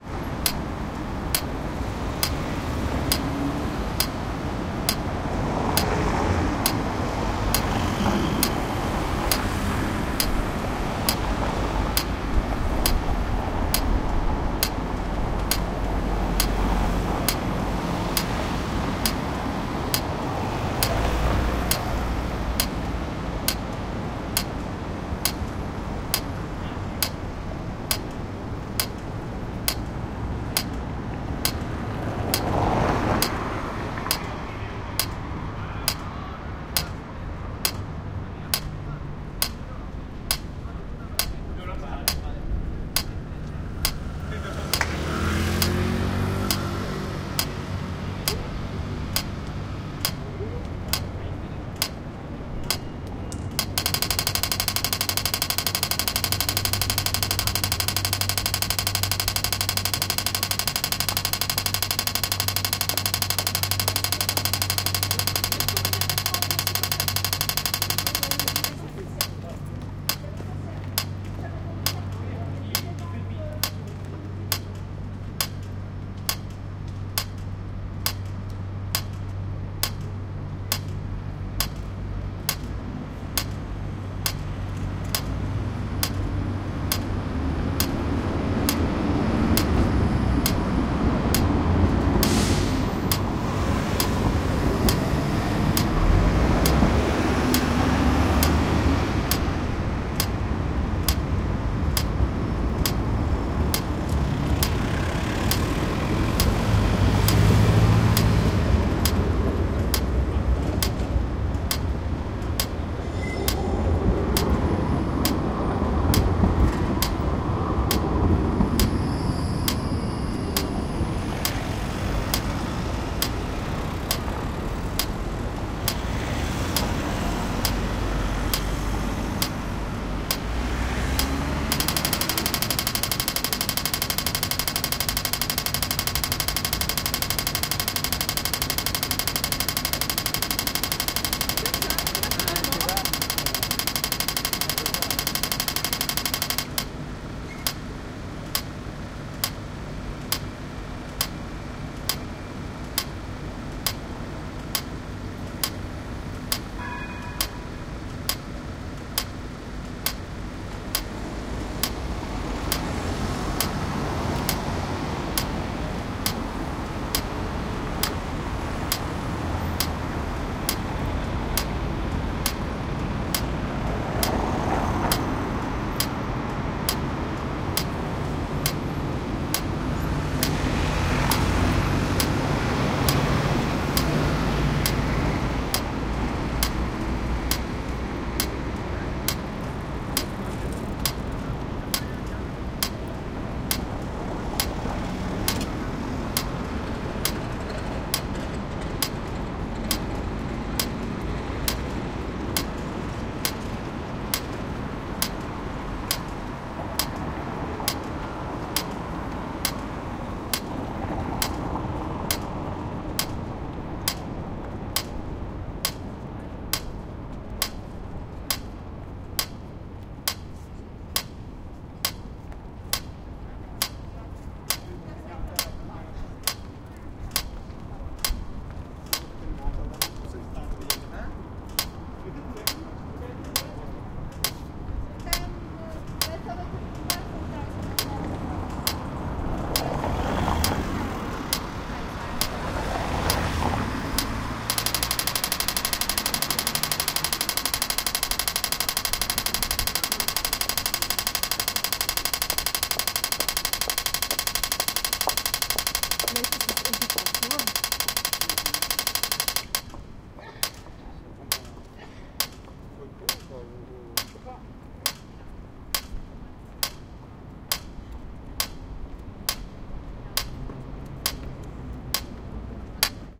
Brussels Pedestrian Crossing Lights Sound
A street crossing in Brussels. Tic tac.
Recorded with Zoom H2. Edited with Audacity.
clicking, signal, tack